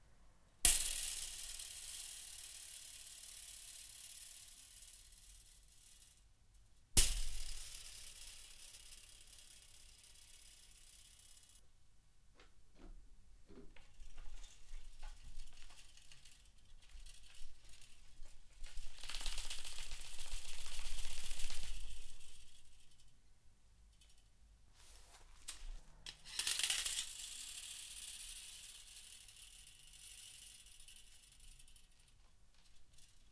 old metal rake shake
i found an old metal rake in the hard rubbish, shaking the rake makes a rattle sound